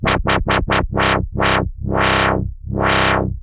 Dubstep Wobble 140BPM
A basic dubstep wobble.
bassline, basic, wobble, bass, skrillex, dirty, 140bpm, wub, dubstep, heavy, synthesizer, loop